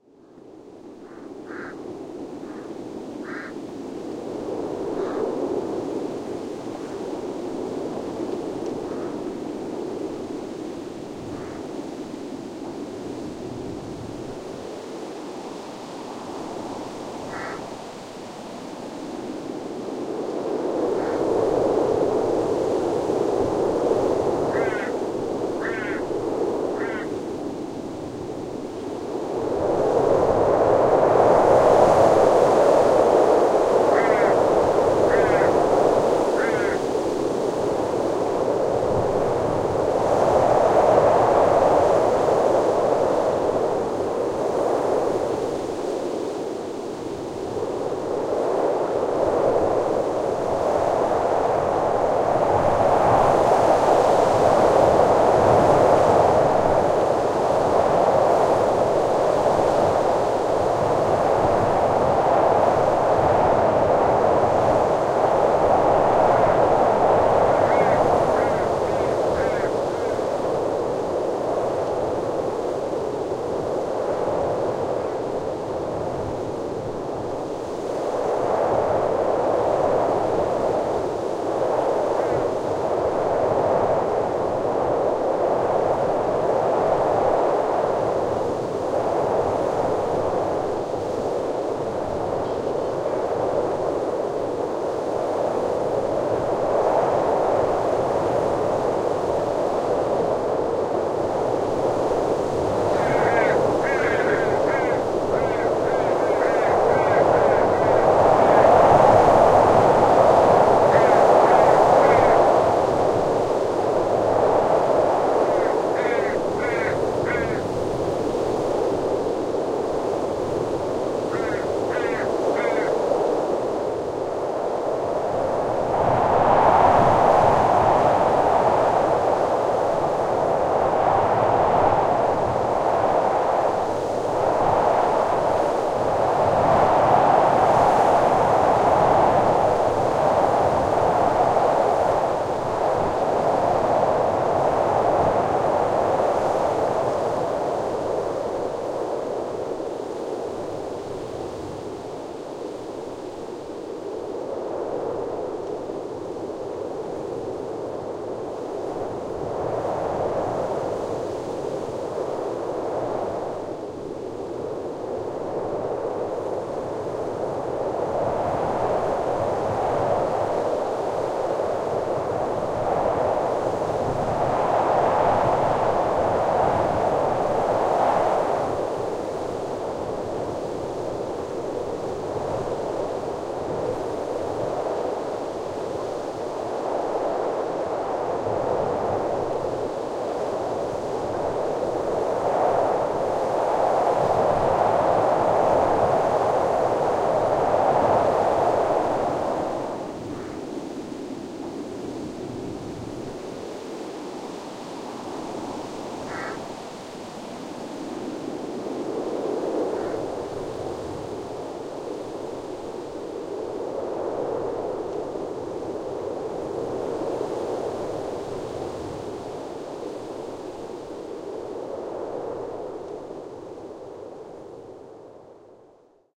Recorded in the Tanami Desert Central Australia. Wind blowing through a Hakea Tree and nearby grasses. You can also hear the occasional Raven in the distance.
i have added some equalisation to this track, taking out the rumble from the wind. If you would like it without equalisation go to Wind Hakea #1 No EQ
weather, soundscape, atmosphere, windy, crow, ambience, ambient, ambiance, wind, nature, atmos, raven, field-recording, trees